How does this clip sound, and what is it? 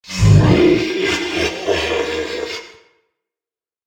Monster Roar 11
monster roar scream villain horror
horror, monster, roar, scream, villain